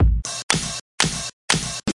minimal drumloop richersound extra hihat miss step break variation 1
acid, beats, club, dance, drop, drumloops, dub-step, electro, electronic, glitch-hop, house, loop, minimal, rave, techno, trance